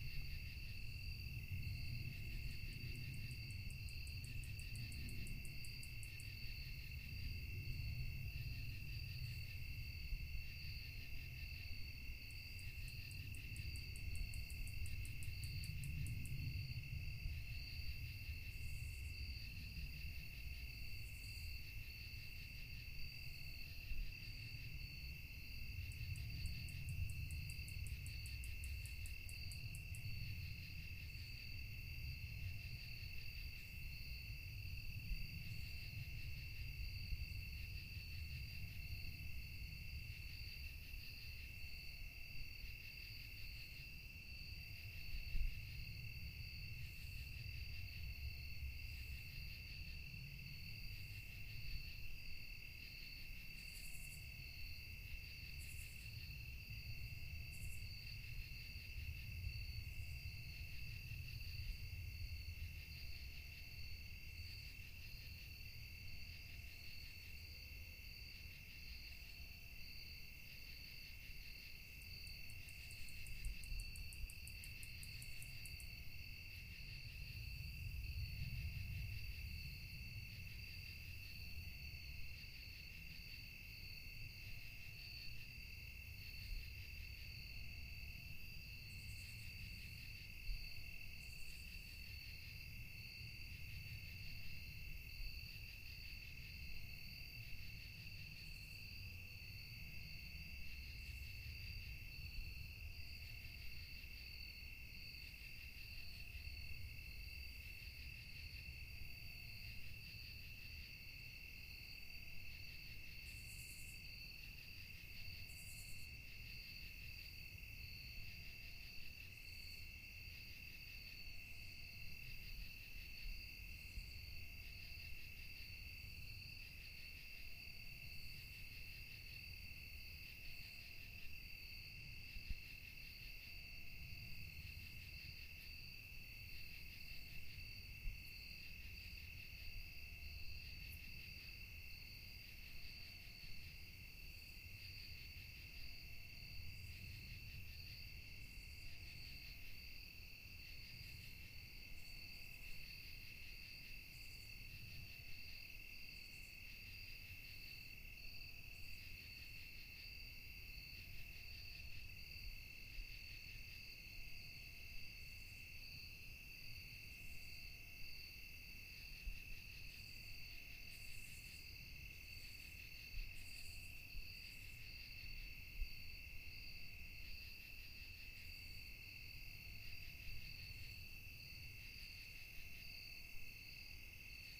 Stereo Zoom H4 recording of a quiet autumn night in the Midwest.
ambiance, ambience, autumn, fall, field-recording, nature, night, nighttime, outdoors, stereo, time